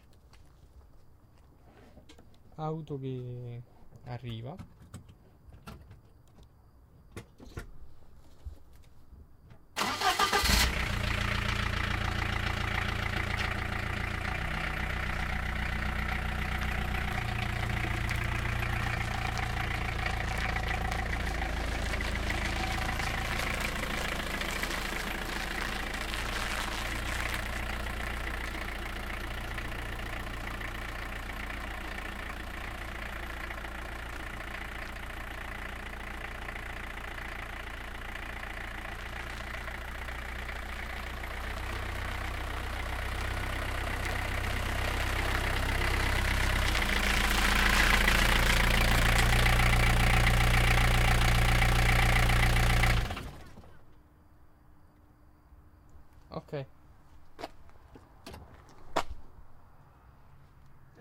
an old diesel Car starts, slow approach an stop
Recorded by Sennheiser MKH416 on Zoom F8